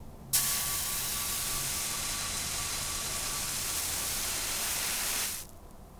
Single drops of water hitting a red hot frying pan then the mic was moved closer inside the pan. Thought it sounded a little like adjusting a filter cutoff.
Recorded with an Audio Technica ShotGun Mic.
This is my first set of many recordings I plan to upload. In the future, I'll be more conscious of subtle sounds creeping in through the open window ;) The extraneous sounds in these recordings are minimal background noise I noticed after the recording. I'll search for tips on recording w/ shotgun mic and shoot for more pure sound for next upload.
noise, sizzle, beat, water, hiss, snare